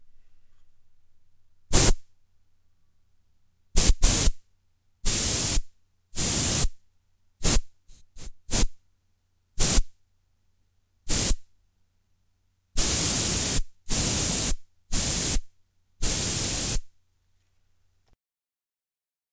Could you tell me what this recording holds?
Air compressor 1
Needed some white noise, took a can of compressed air and did a bunch of short bursts using AT2020 condenser microphone.
air, blowing, bursts, compressor, effects, fffwwwww, noise, sfx, short, white